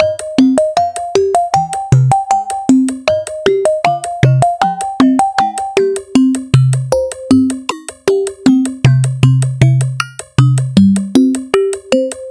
20140525 attackloop 78BPM 4 4 Analog 2 Kit mixdown2
This is a loop created with the Waldorf Attack VST Drum Synth. The kit used was Analog 2 Kit and the loop was created using Cubase 7.5. Each loop is in this Mixdown series is a part of a mixdown proposal for the elements which are alsa inclused in the same sample pack (20140525_attackloop_78BPM_4/4_Analog_2_Kit_ConstructionKit). Mastering was dons using iZotome Ozone 5. Everything is at 78 bpm and measure 4/4. Enjoy!
78BPM, dance, electro, electronic, loop, minimal, rhythmic